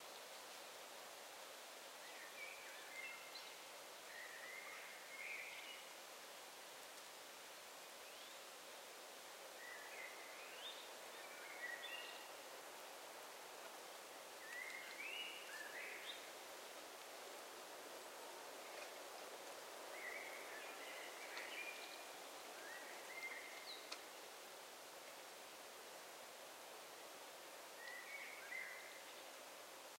Forest Birds (loop) 02
Sound of a forest with singing birds;
you can loop it
ambient, athmo, atmo, atmosphere, bird, birds, birdsong, forest, nature, spring, tree, trees, tweet